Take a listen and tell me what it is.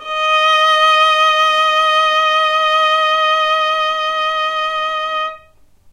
violin arco vib D#4
violin arco vibrato
vibrato, violin, arco